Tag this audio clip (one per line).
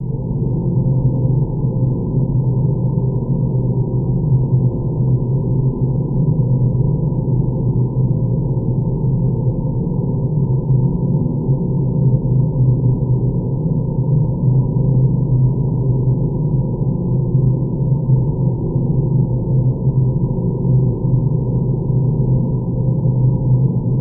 ambient
white
noise
wind
synth